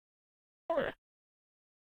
Parrot oh yeah mumble to himself
Parrot mumbling oh yeah.
Imitated by voice over Janessa Cooper with Pro Voice Master Services and done in our studios. Enjoy,
parrot, macaw, mimic, bird, chatter, cry, birds, talk, imitate, talks, tropical, talking, pet, rainforest, exotic